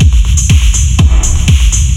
Industrial house loop (1) 122 bpm
An industrial four to the floor beat created with heavy use of reverb and compression. 122 BPM. Enjoy!
dark, drum, bpm, heavy, 122-bpm, industrial, 122, house, loop